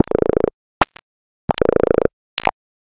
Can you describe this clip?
part of pack of 27 (funny) sounds, shorter than 3 seconds.